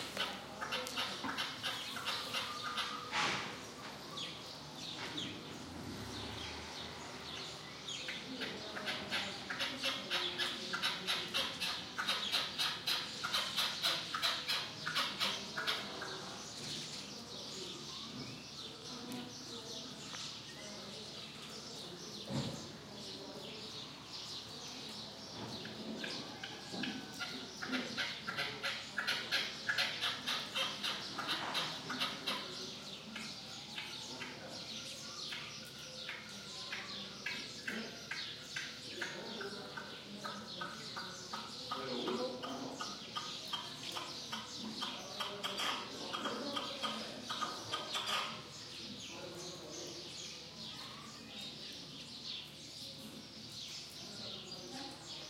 20120429 caged partridge 04
Red-legged partridge callings + some background noises. Recorded on Sanlucar de Guadiana, Huelva province (Andalucia, S Spain) using Frogloggers low-noise stereo pair (BT 172-BI), FEL Battery Microphone Amplifier BMA2, PCM M10 recorder
spring, red-legged-partridge, field-recording, alectoris, village, Andalusia